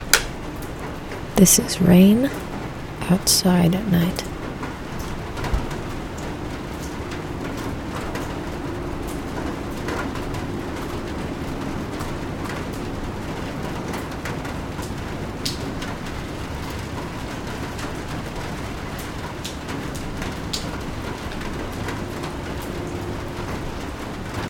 tin roof rain
rain on a tin roof